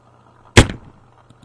Me hitting a microphone. It wasn't damaged.
club, smash, wood, flesh, fist, bat, hit, crumble